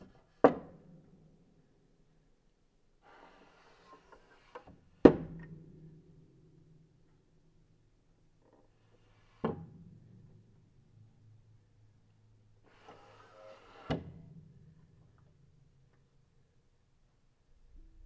Baldwin Upright Piano Lid open and close